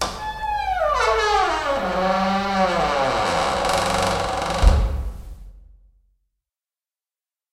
Door creaking 02 with Reverb
close, closing, clunk, creak, creaking, creaky, door, handle, hinge, hinges, lock, open, opening, rusty, shut, slam, slamming, squeak, squeaking, squeaky, wood, wooden